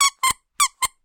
One of a series of recordings of a squeaky rubber dog toy pig being squeazed so it grunts